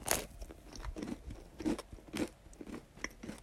a person chewing food
chewing, food, person